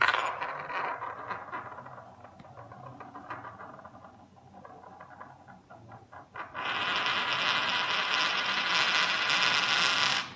coin is spinning